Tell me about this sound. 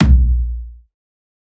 heavily pounding bassdrum originally made from 10 litre bottle punching sounds recorded with my fake Shure c608 mic and heavily processed by adding some modulations, distortions, layering some attack and setting bass part (under 200 Hz) to mono.
will be nice choice to produce hip-hop drums, or experimental techno also for making cinematic thunder-like booms